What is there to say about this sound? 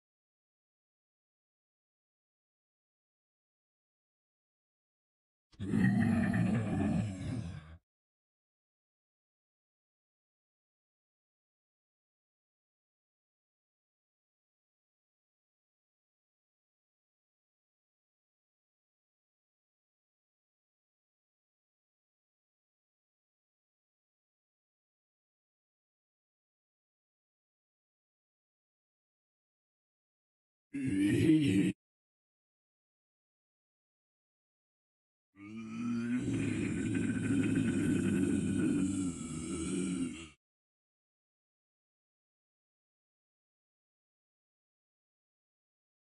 Scott Friedman as a zombie, through Symbolic Sound Kyma, from the movie "Dead Season." Syncs at 1.08.40.13.